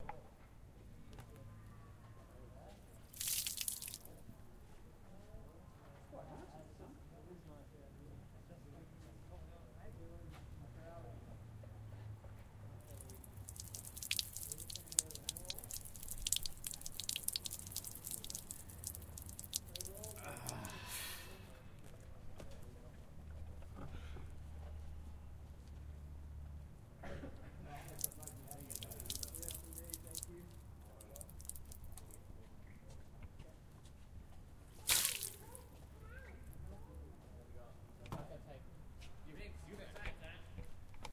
water splash running
close mic of water splashing/running off a plastic chair onto bitumen
water, splashes, running, splash